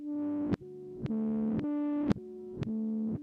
ReverseBassPinchHarmonic Tri-Tone
I played a bass pinch harmonic through a dying bass amp, which gives it the heavy distortion. I imported the file into audition, then reversed the waveform.